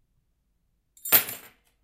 keys fall Recording at home
chrash, fall, iekdelta, keys